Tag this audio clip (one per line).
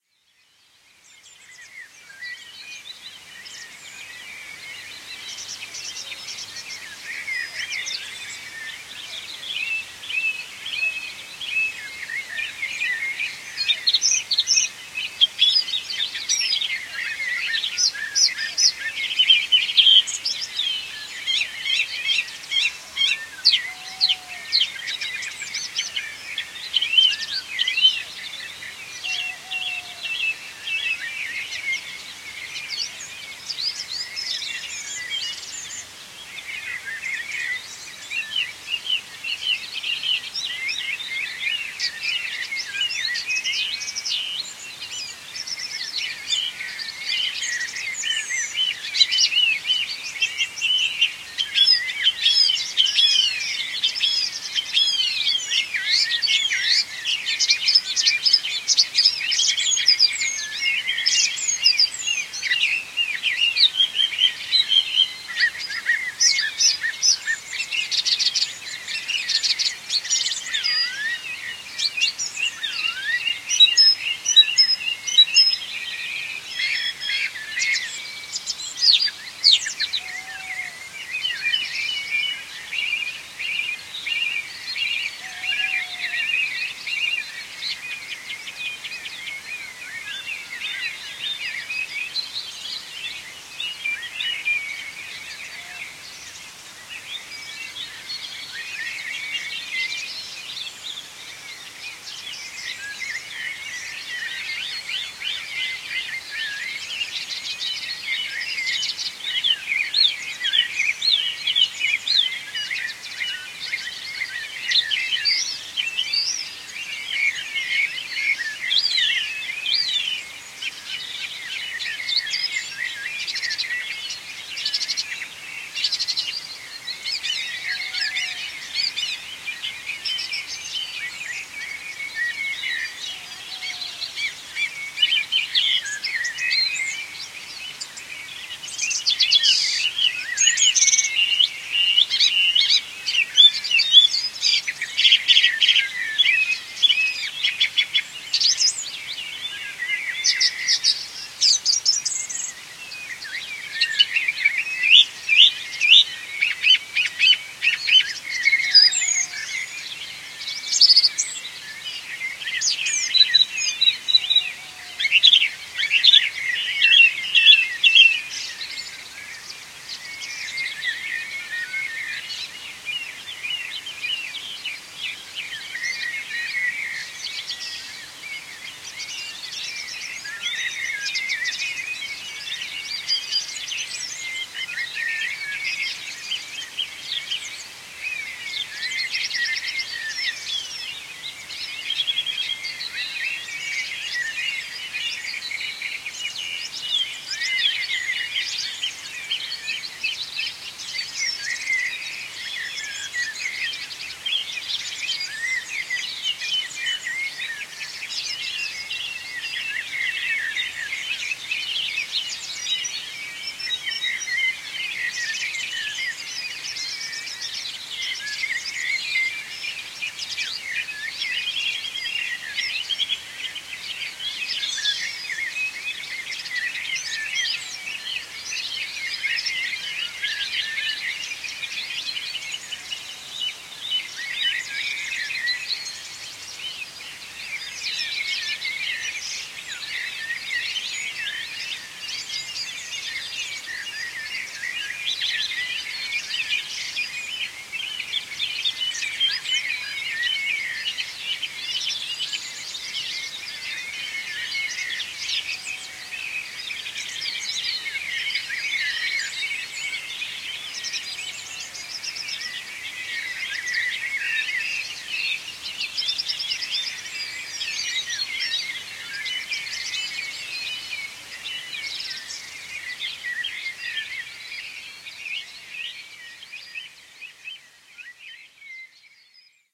dawn
owl